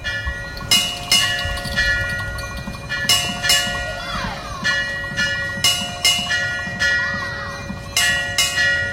Bells ringing for evening prayers at 2 temples in the evening in Brickfields, Kuala Lumpur. I'm standing in between them with my Zoom H2 recorder.
hindu, temple